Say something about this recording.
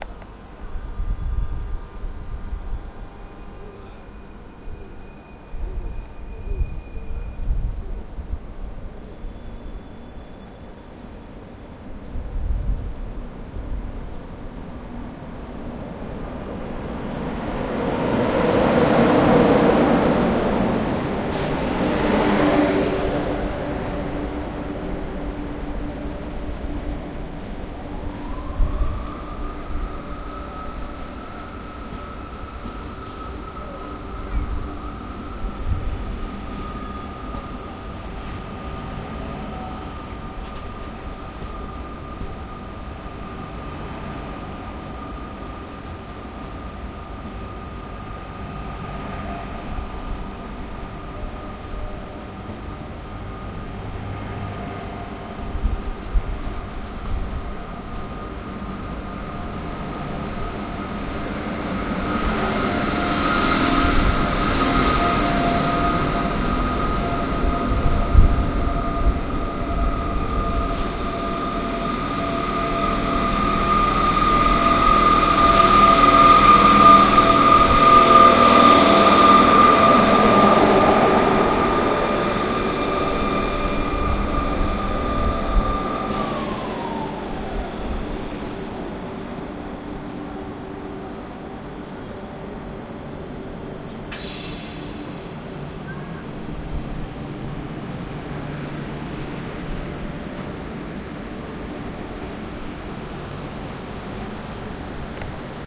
One more train entering a station. Good stereo image and definition. Zoom h2 record
stereo, machine, station, train, ambient